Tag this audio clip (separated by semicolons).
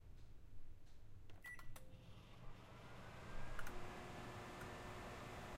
circuit; hum; microwave